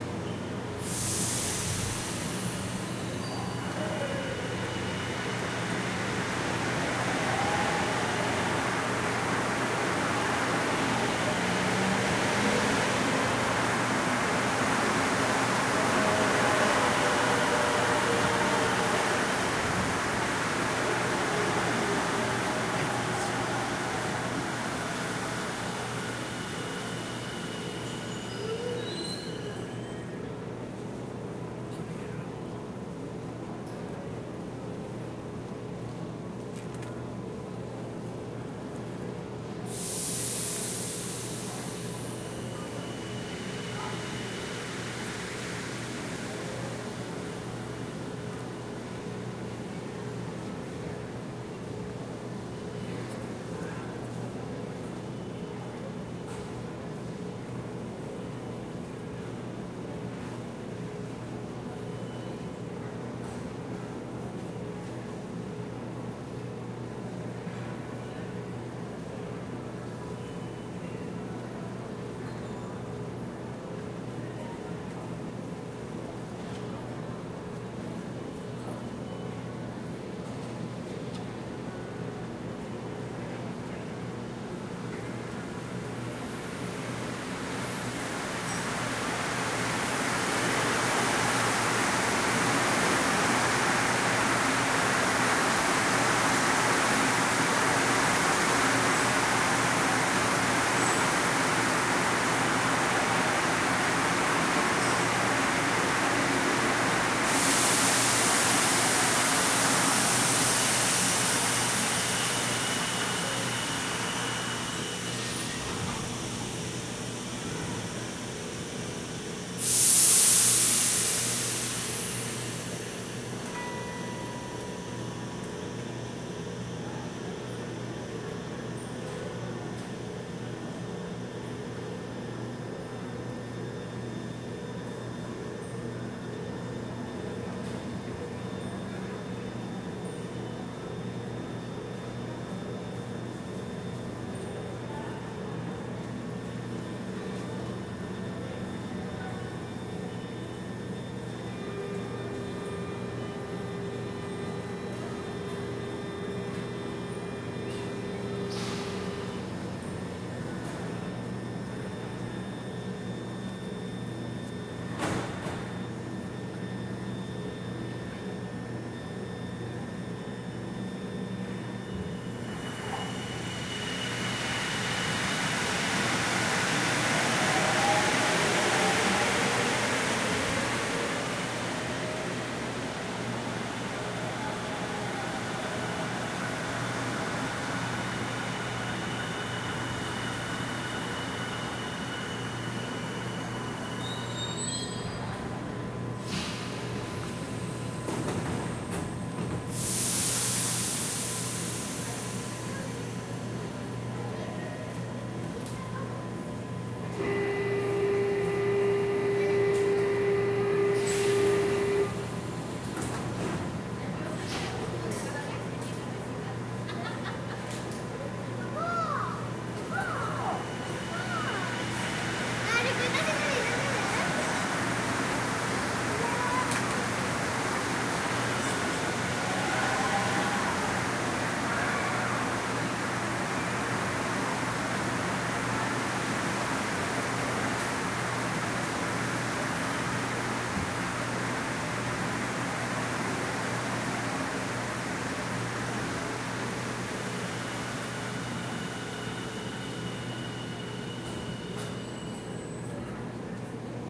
A trip for the MExico City´s Metro. Un viaje por el metro de la CDMX.